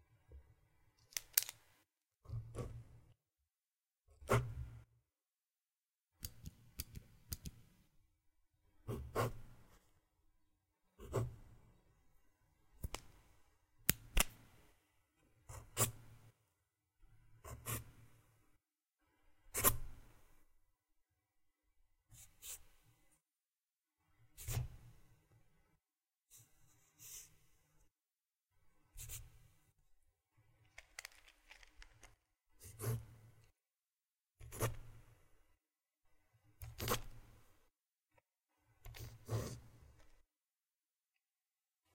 Writing Checkmarks with Different Tools
This is a variety of written checkmarks using a few different tools - a pen, pencil, marker, and stylus. Recorded with a Blue Yeti USB mic.
If you find the sound useful for your project, I would love to see what you made! Thanks!
writing, pen, draw, marker, pencil, checklist, stylus, write, drawing, paper, checkbox, swipe, check